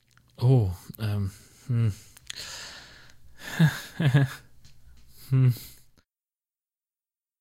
AS060822 Shame
voice of user AS060822